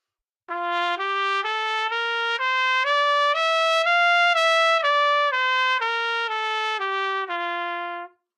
Part of the Good-sounds dataset of monophonic instrumental sounds.
instrument::trumpet
note::Csharp
good-sounds-id::7339
mode::major